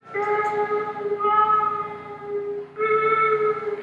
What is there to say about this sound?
Medio pistón 3 notas
3 notas de trompeta pisando pistón a la mitad
3 trumpet notes half pressing piston valve